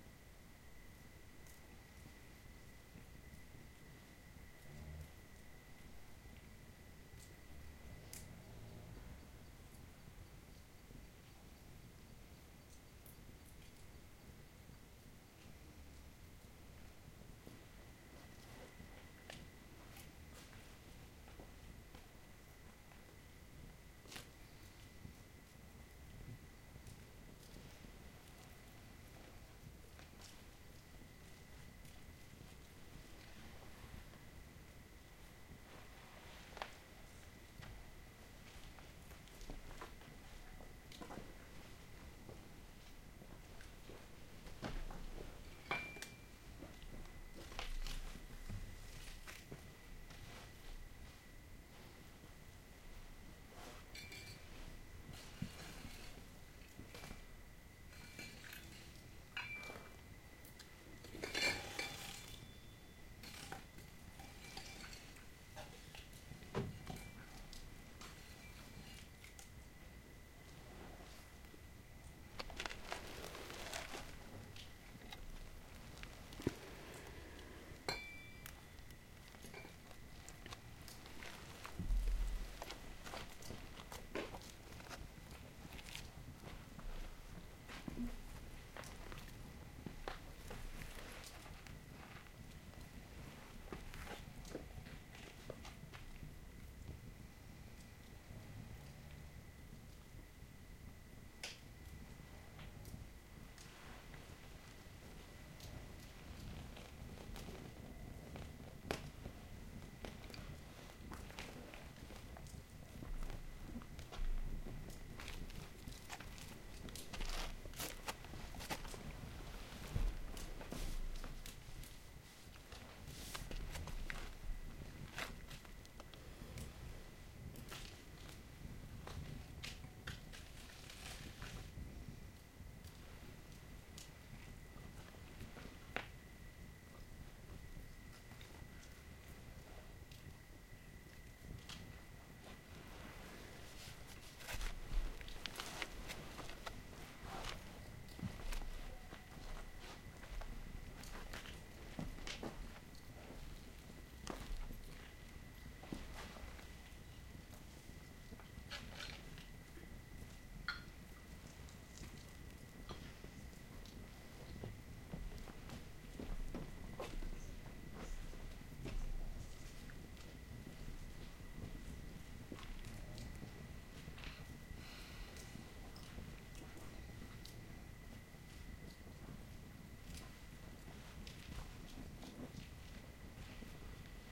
campsite night hut crickets people sleeping some moving around steps dirt tending fire gathering cups
hut, crickets, campsite, night